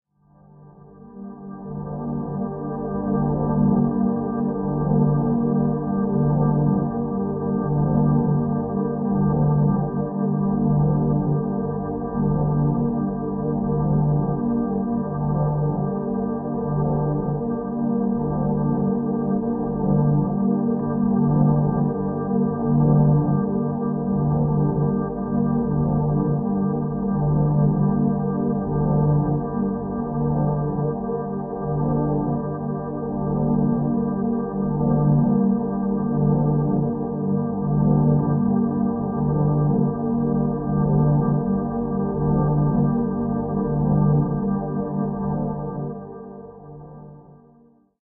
Warm Ambient Drone
Ambient drone in the key of C, 80bpm.
Atmosphere, Ambient, Drone